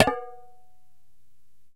Striking an empty can of peanuts.